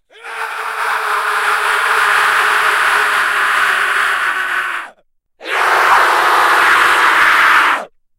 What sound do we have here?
A cyber version of jorickhoofd's scream of frustration 2 sound. Edited with Audacity.
Screams of frustration 2 by jorickhoofd
Plaintext:
HTML:
shouting, rage, voice, mad, cyborg, frustration, crazy, anger, insane, shout, scream